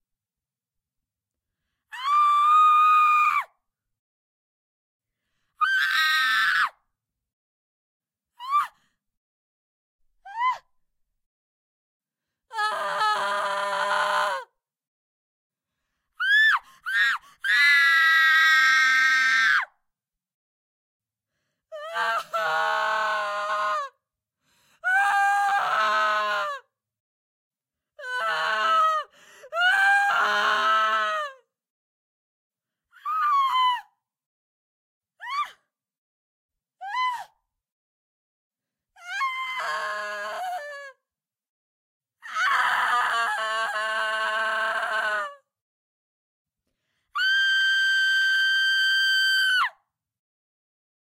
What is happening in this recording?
Various types of screams from deep yelling to high pitched horror, conveying fear, panic, disgust, grief, pain.
Unfiltered/edited for your convenience. #adpp
Recorded using a RODE NT-1 Microphone through a UK=r22-MKII interface using REAPER.
I recorded this within a blanket fort to reduce external noise and potential echo.
panic, scream, fear, yell, grief, Female, woman, adpp, shout, cry, pain